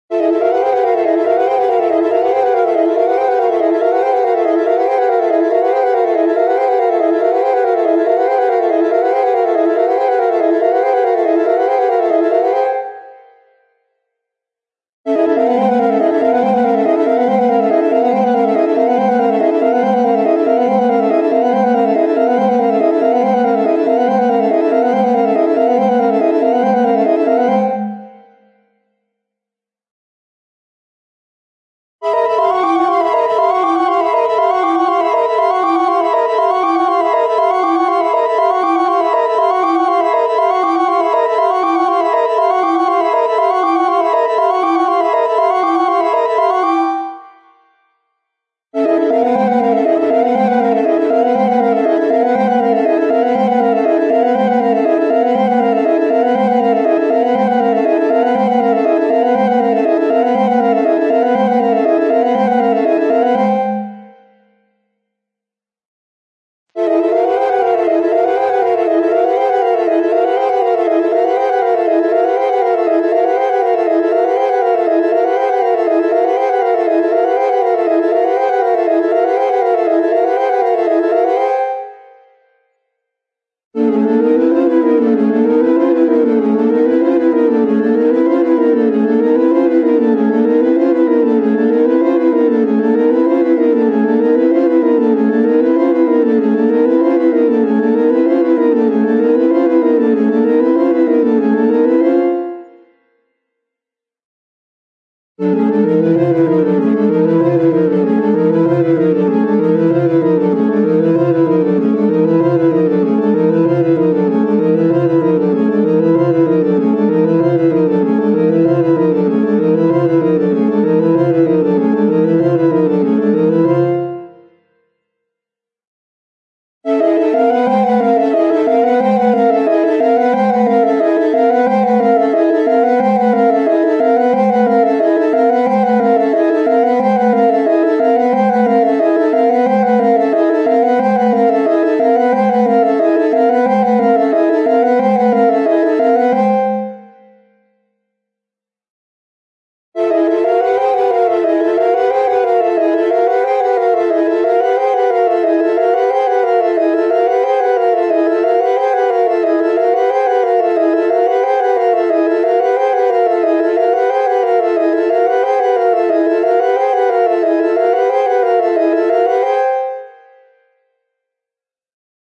From a request for "spinning music", I was inspired to throw together a very quick Analog Box circuit to do this. I only bothered to put one voice for each of 3 parts, the upper two being a fifth apart, and a third one, lower, either going counter to the upper two or locked in a major third below. So this clip is from some separate runs in different speeds, different keys, and the two separate variations for the lowest voice. For that matter, I also have the analog box circuit and could easily modify it as well. The voice was supposed to emulate a violin but the noise in the modulation never gets the right quality, and I think it ends up sounding more like wind instruments or some such thing.
spinning, strings, transition, wind-instrument, music, abox, synthetic